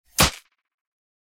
GASP Weapon Slash 1
Sound FX for a slash style weapon impact.